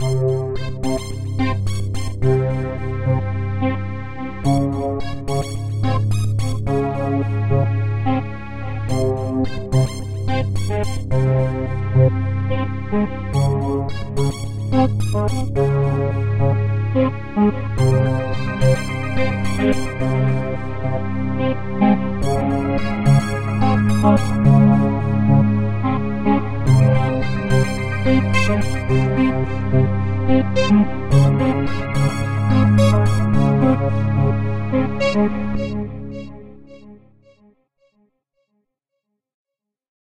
Tisserand-RainyCityAmbience
instrumental, jingle, movie, loop, ambience